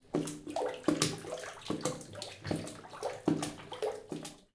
playing water bath
Playing with water in the bathtub.
bath, field-recording, splash, unedited